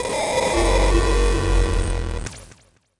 BentPhoneFX11 IBSP1
This samplepack contains 123 samples recorded from a Cicuit Bent Turkish Toyphone.
It has three subfolders containing a) sounds from the Toyphone before bending, (including the numbers from 0-9 in Turkish), b) unprocessed Circuit Bent sounds and c) a selection of sounds created with the Toyphone and a Kaoss Pad quad.
for more information & pictures please visist: